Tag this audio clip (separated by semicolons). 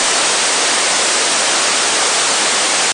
ambience; ambient; atmosphere; background; background-sound; general-noise; noise; tv-noise; white-noise